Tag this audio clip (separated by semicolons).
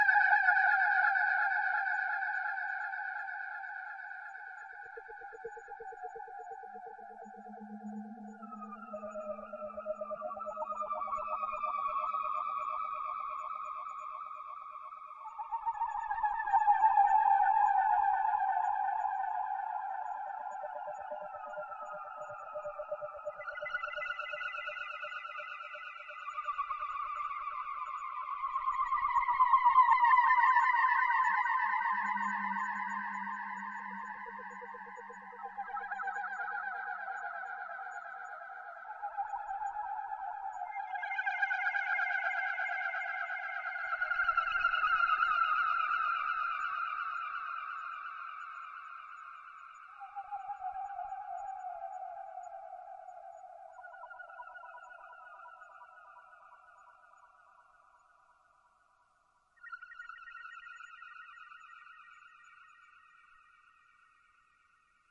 Analog; Sequencer; Tetra